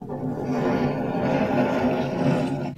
Boulder Push
I think it sounds bouldery enough, right?
pushed, dragged